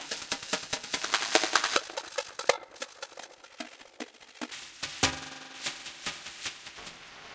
up in space, echomania, crunchy